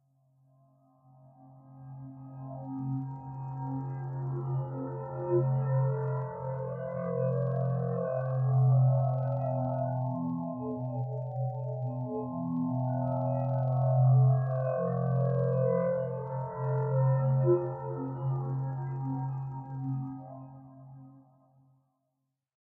bald snake
This is a slippery kind of sonic drone
drone, glass